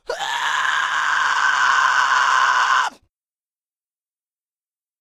Pig Squealing recorded by Alex (yet another one)